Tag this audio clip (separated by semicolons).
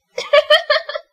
woman; voice; female; english; speak; talk; girl; laugh